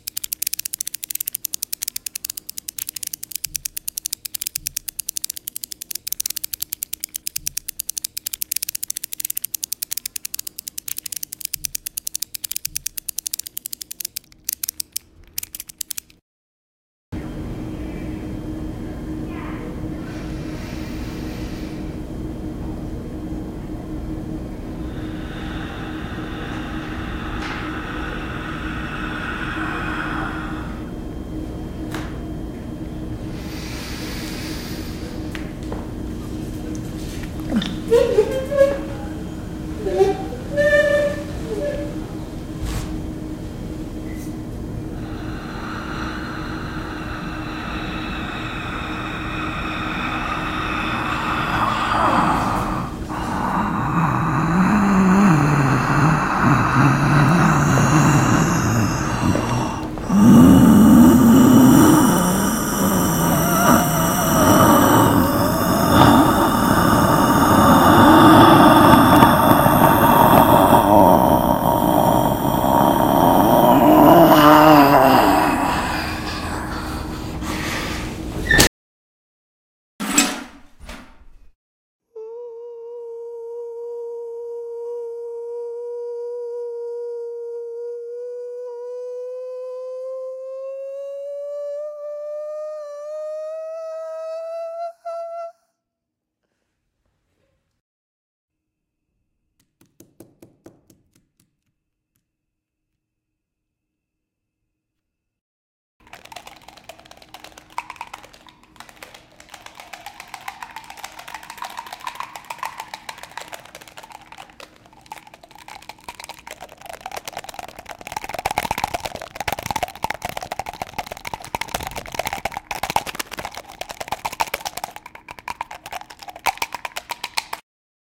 Sketch #1 Raw Audio
A collection of sounds I recorded and subsequently mixed together in a composition. Here they are in their raw, unprocessed form. They include:
--Continuous clicking from turning a removable screwdriver head
--Whispered breathing and grunting (recorded at a very high volume for intensity and boomy room tone)
--A metal trash can clanging
--A warbling falsetto climbing in pitch
--Faint taps
--A plastic pill bottle being shook and twisted
breathing, buzz, can, click, clink, falsetto, growl, metal, MTC500-M002-s13, plastic, ratchet, room, screw, trash, voice, wheezing